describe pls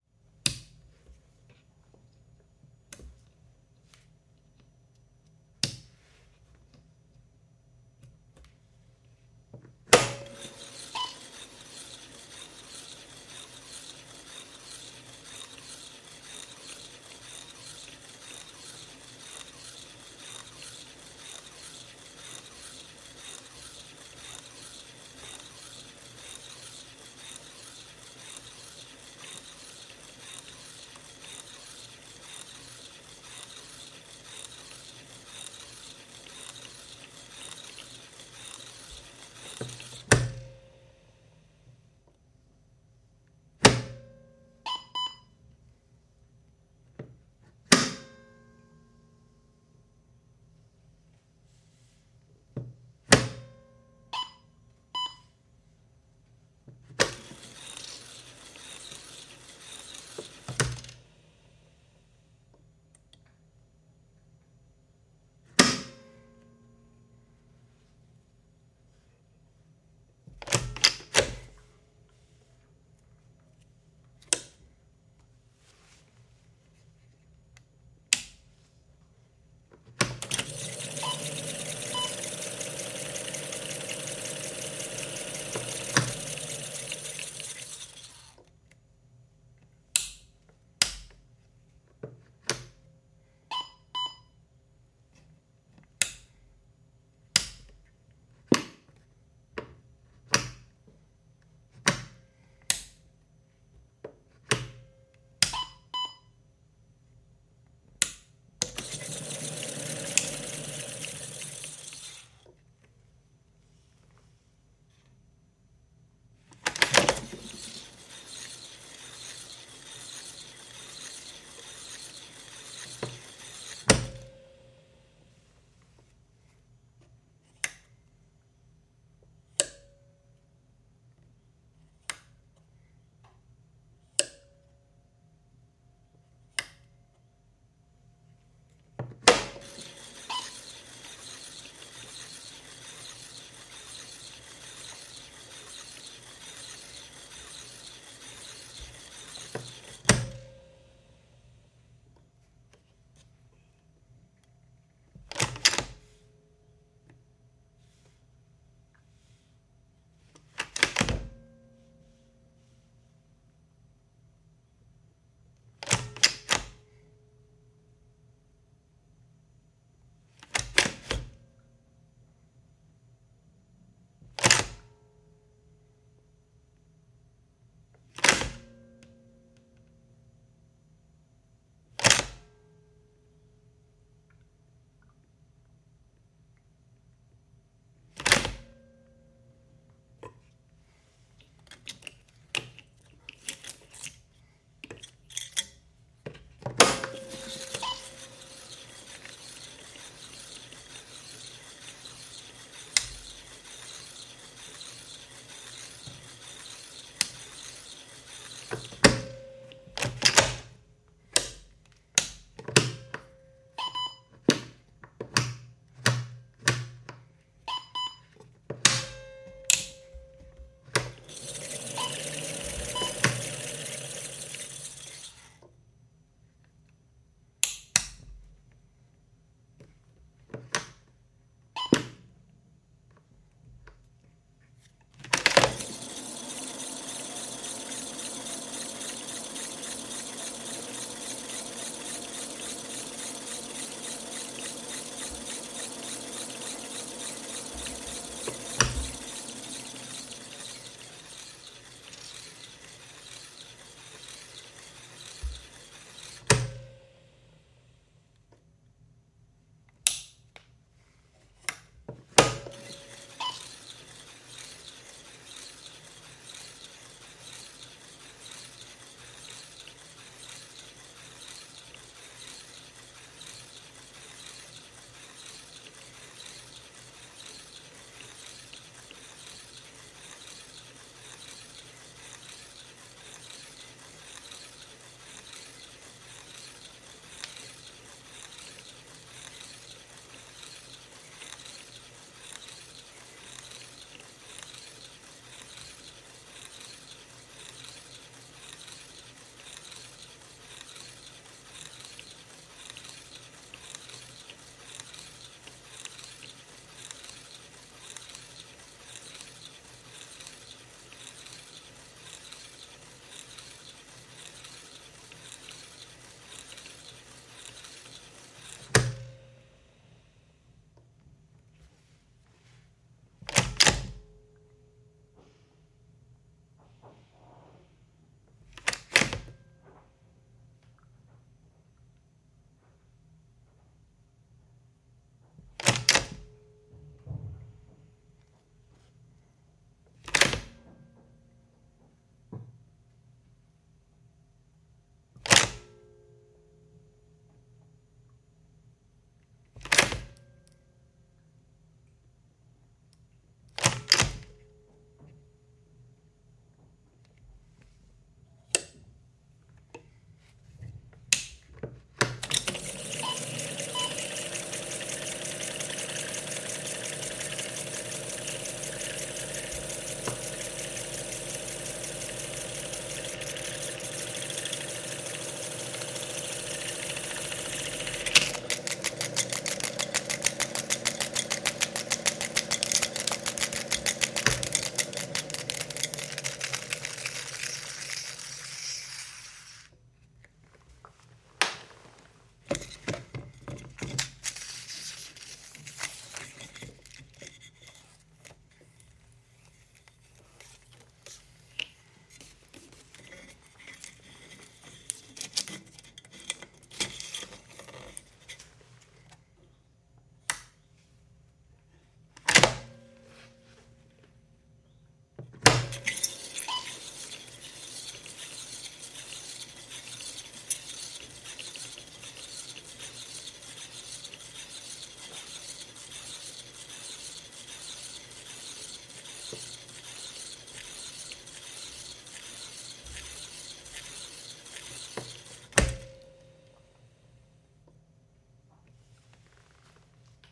NAGRA IV Recorder
This is a recording of all the mechanical parts of a NAGRA IV audio recorder. Mechanical parts, sound of the magnetic tape ect...
Recorded with a Neumann TLM103